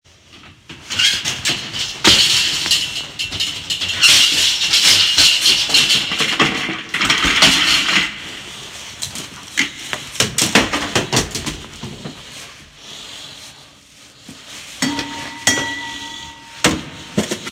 Breaking sounds foley 2
sound of a room being trashed
mayhem, foley, crashing